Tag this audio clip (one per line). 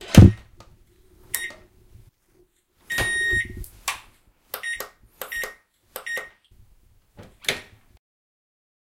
16
bit